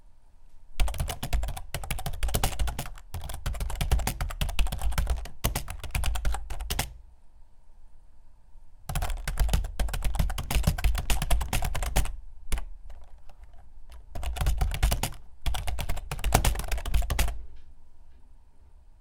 computer keyboard fast
Writing on a desktop computer keyboard in a fast fashion.